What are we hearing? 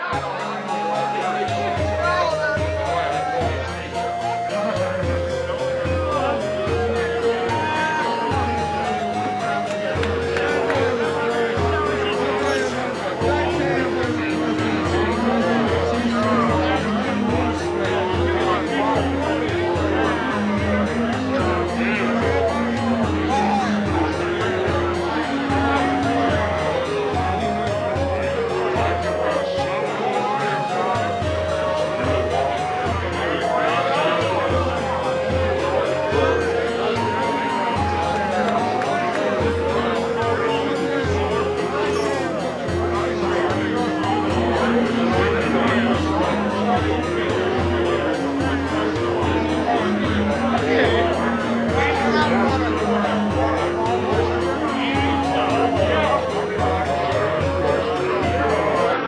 My Tavern 1
This is a constructed sound I made for a Fantasy Role Playing game. It's a medieval or fantasy tavern with music and people sounds. Nothing identifiable, but the music is definitely medieval or fantasy-related. Enjoy!
D, Fantasy, medieval, middle-ages, Tavern